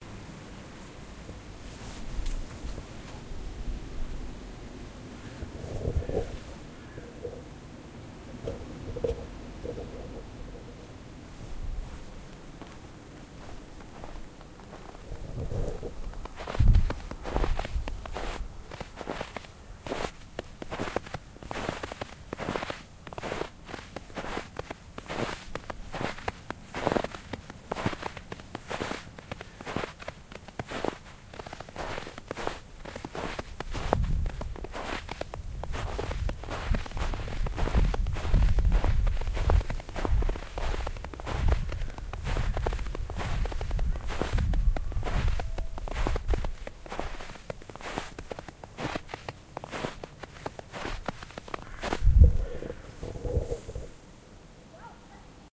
Real steps in the snow
snow, Real, steps